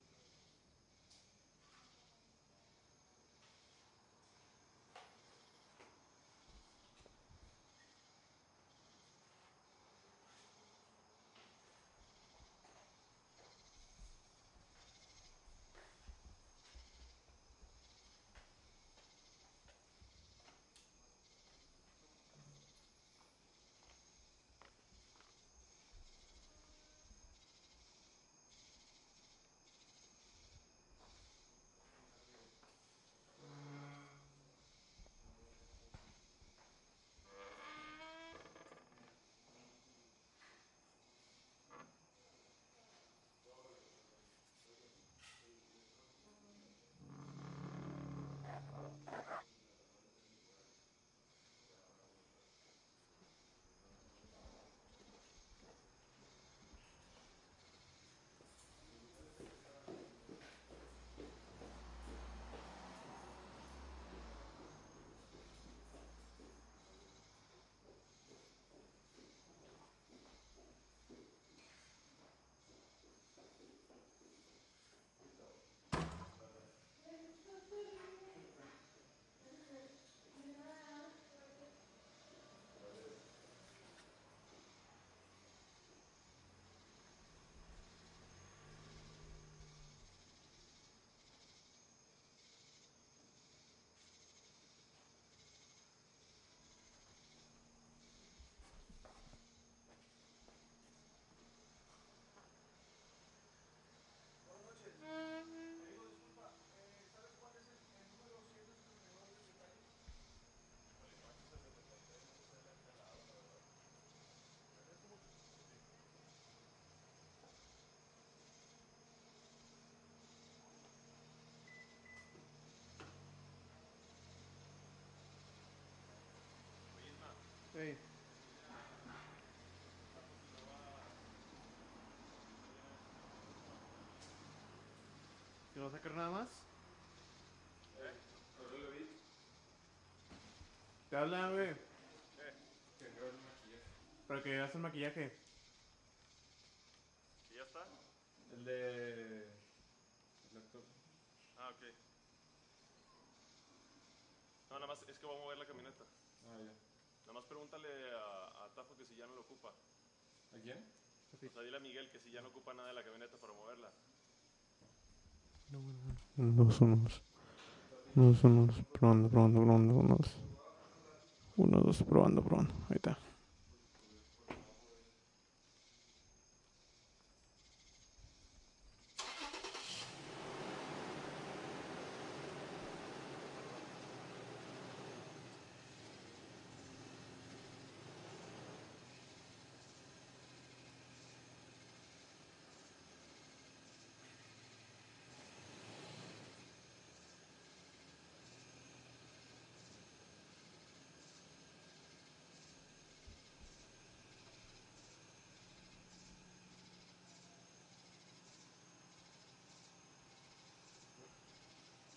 Night, Chipinque
recorded in a tascam dr100 mkii, at 11.30 pm, sound of the forest in the night , birds, crickets, some cars, wind, and trees
chipinque forest, monterrey, nuevo leon, mexico
sorry for my voice,
birds, city, crickets, forest, Night, wind